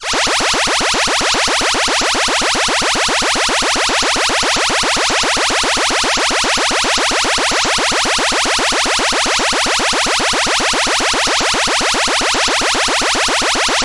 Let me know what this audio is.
A cheap 8-bit like siren with a cartoon feel. Made on a Roland System100 vintage modular synth.
cartoon, sci-fi, siren, Synthetic